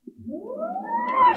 Climbing Rope With Caribiner
A dual mono recording of a carabiner sliding down a braided climbing rope towards the microphone. Rode NTG-2 > FEL battery pre-amp > Zoom H2 line in.
climbing-rope, mono